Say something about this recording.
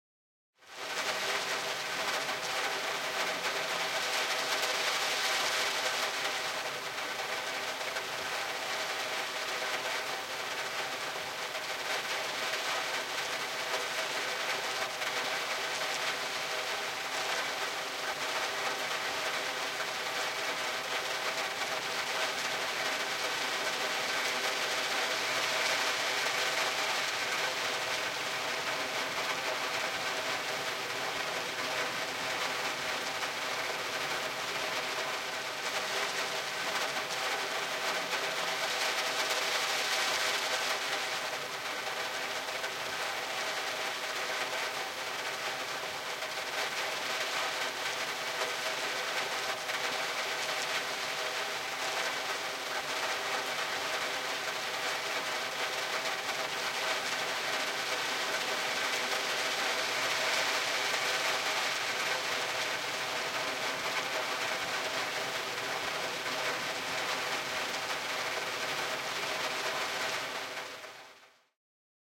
AMB Rain Window
Recorded rain hitting the windows inside my car. Used a ZOOM H2, light eq and compression to take out unwanted noise, and then spread out the stereo field.
rain, window, ambience, interior